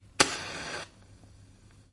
20170530 match.igniting
Lighting of a wooden match. Sennheiser MKH 60 + MKH 30 into Shure FP24 preamp, Tascam DR-60D MkII recorder. Decoded to mid-side stereo with free Voxengo VST plugin
light, burn, matchbox, gas, lighter, matches, ignite, fire, smoke, burning, spark, lighting, candle, ignition, strike, lamp, smoking, cigarette, match, matchstick, flame